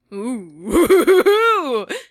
oooh ooh hoo hoo
a sort of "close call" laugh that I recorded when playing FNaF, most likely hearing a loud breath at one of the doors.
female, girl, human, laugh, ooh, vocal, voice, woman